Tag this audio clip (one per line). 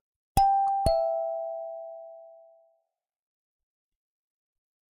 bell
chime
ding
dong
door
doorbell
foley
home
house
ringing
tuned